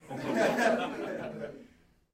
Recorded inside with a group of about 15 people.
adults, audience, chuckle, fun, funny, haha, laugh, laughing, laughter, live, theatre